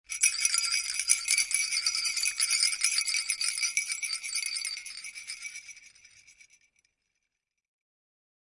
C3 toy-rattle
a kids rattle used as a toy